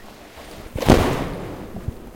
Recorded with a Sony ECM Stereo Microphone. It's hard to tell what this slam is, which makes it very versatile. I'm pretty sure, however, that it is in fact a distant car door slam.
distant, slamming